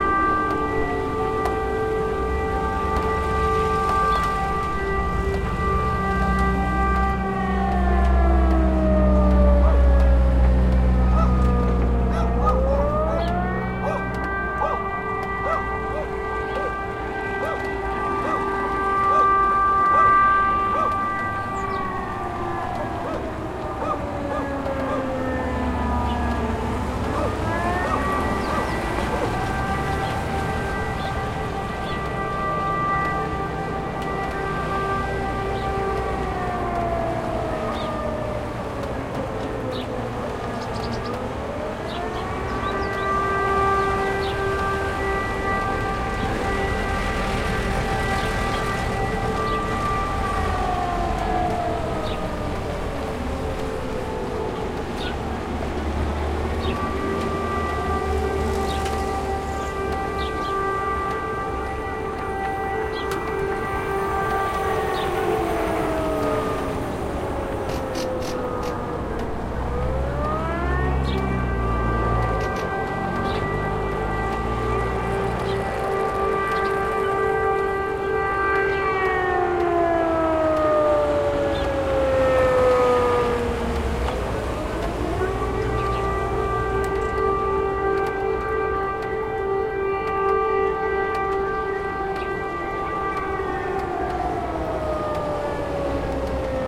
air-raid siren testing
Testing (or may be not...) of air-raid warning siren.
Wail of sirens, barking dogs.
Recorded: 27-03-2013
ambient, ambience, wail, Omsk, field-recording, noise, rumble, town, ambiance, siren, background-sound, air-warning, atmo, city, atmosphere, soundscape, background, howl, Russia, street